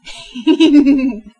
dumb giggle
so these are all real reactions to certain things i do, i.e, video games, narrations, etc.
i myself need genuinely real vocal reactions. i know i'm not the only one, either, so i post mine as well so others may use them.
interestingly, i've gotten one person who took the time to uh... tell me how they... disapproved of my voice clips, so i just wanted to say that if you wanna use them, do, if not, don't, that's why they are there. i enjoy animating, and others do too, wanting to use others' voice clips. i also had a couple people make techno remixes of a lot of my sounds (thank you by the way, they're awesome).
it's not that i care about the... interesting way the one person expressed their opinion, it's just to let some similar acting people know that i post these for a reason. *shrug* but whatever floats your zeppelin, i honestly don't care. ANYWAY, for those who DO use them, thank you. :P
laugh, giggle, dumb